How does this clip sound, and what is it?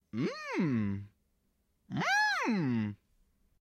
A simple "Mmm!" spoken by a male voice, as if eating or smelling delicious food. The second "Mmm!" is in a higher pitch.
man, mmm, mm, smell, mmmm, food, eat